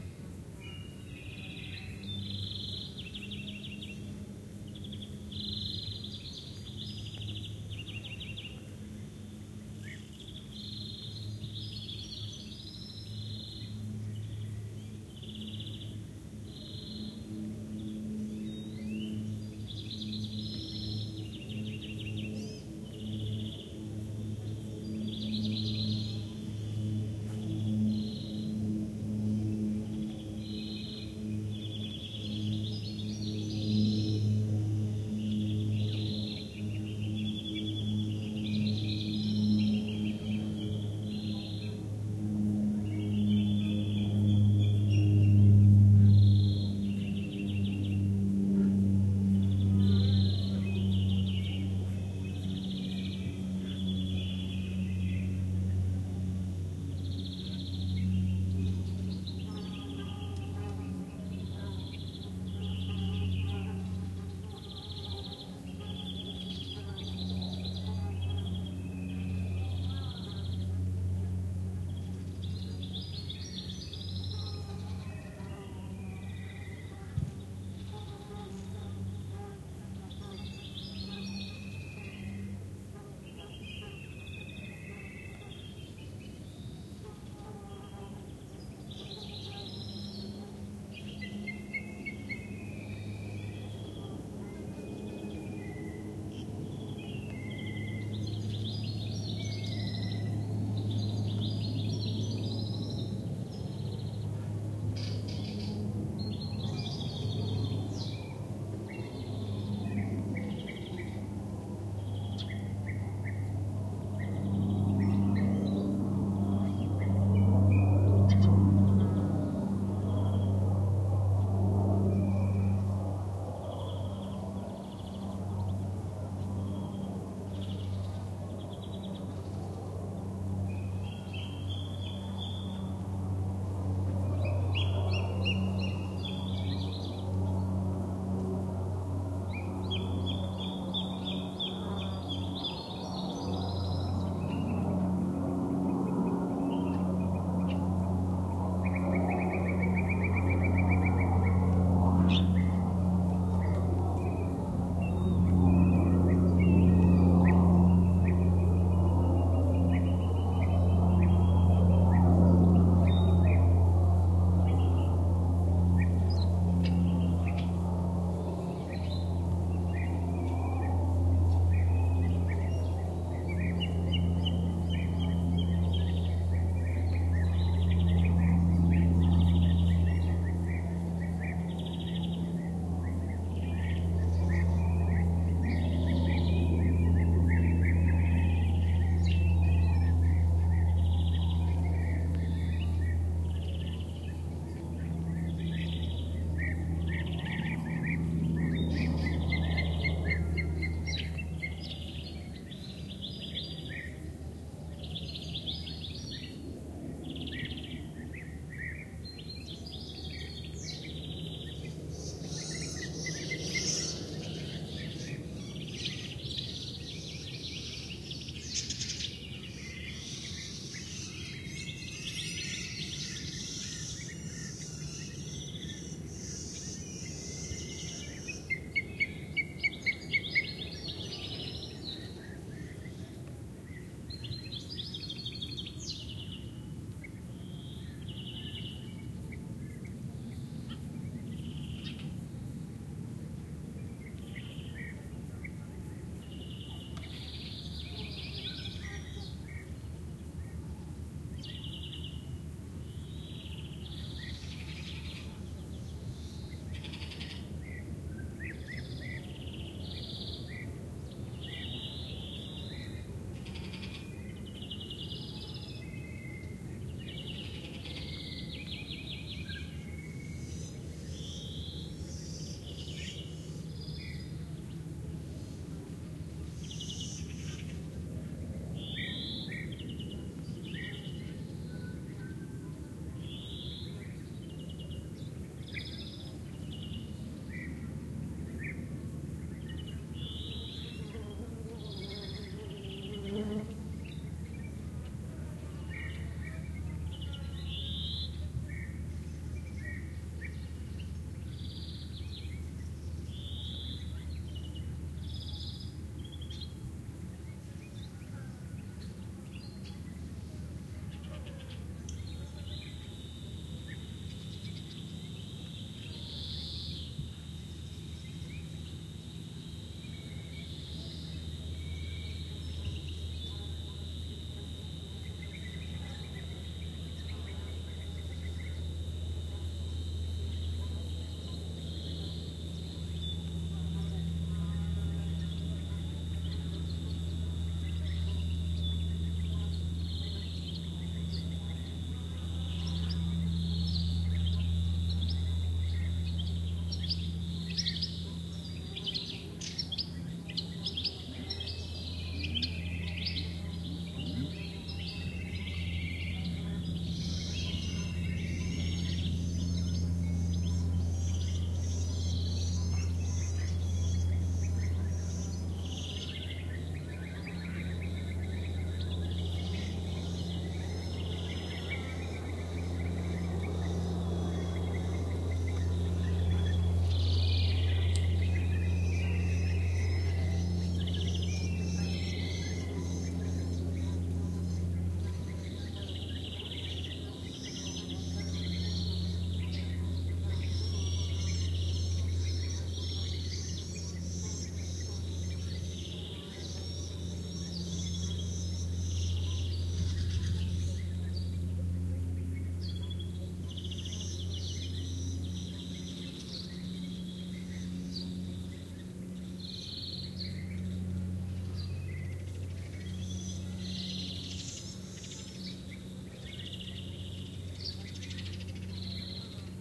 20070722.pinar 1015am
part of the '20070722.pine-woodland' pack that shows the changing nature of sound during a not-so-hot summer morning in Aznalcazar Nature Reserve, S Spain, with trailing numbers in the filename indicating the hour of recording. Many close bird calls (warblers, jays, bee-eaters, Black Kite), a passing airplane, flies and wasps. By 5:11 cicadas begin to warm up... Most bird screeching comes from Blue Jay youngsters, they are noisy indeed
ambiance, birds, donana, environmental-sounds-research, field-recording, forest, insects, nature, south-spain, summer, time-of-day